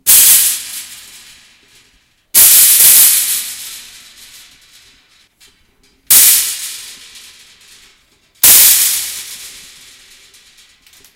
The shady zookeeper hits a cage